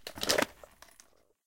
Holster Pistol
Holster your pistol soldier! Enjoy.
gun, holster, Leather, pistol, reload, revolver, Weapon, western